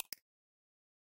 a sound for a user interface in a game